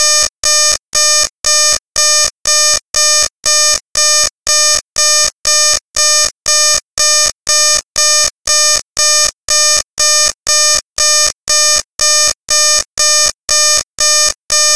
The curve has been draved in Audacity and edited
alarm, alerts, emergency, warning, malfunction, clock, electronics, alert, technology, error, computer, beep, beeping